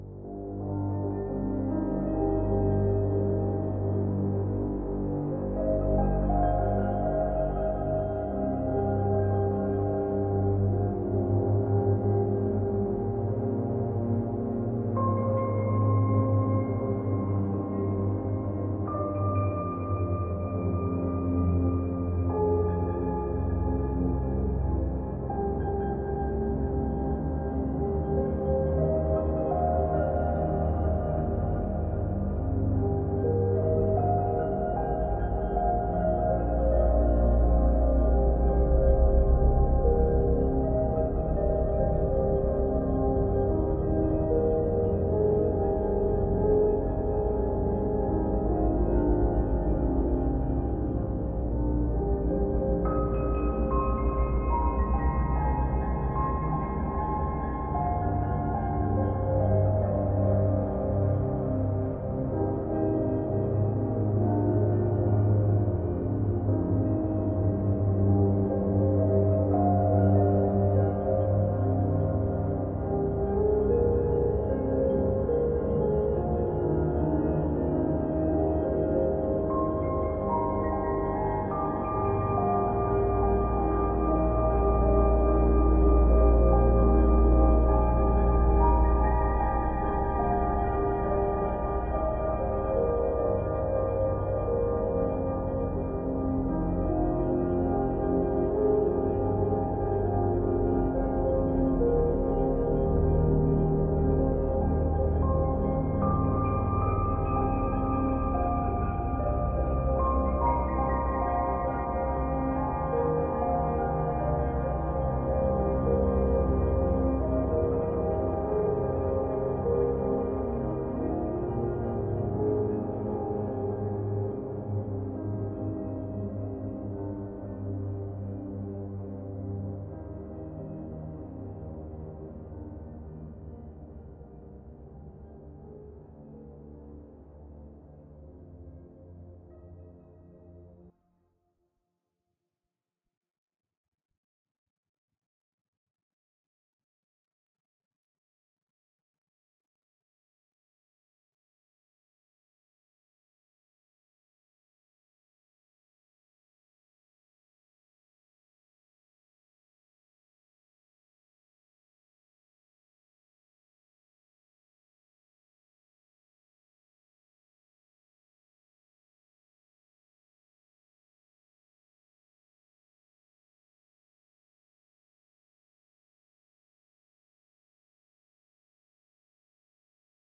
Sad Movie sounds 01
Cinematic, Film, Free, Movie, Sad, sound